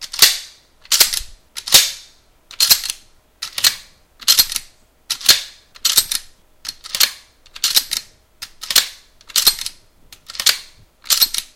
Shotgun Action Cycling
A Remington 1100 cycling without any shells. Recorded and edited with Audacity.
cock, gun, load, reload, rifle, shotgun